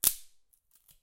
Small twig being snapped in two. Recorded on a Zoom H4N using the internal mics.